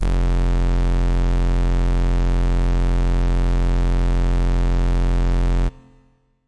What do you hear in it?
The note F in octave 1. An FM synth brass patch created in AudioSauna.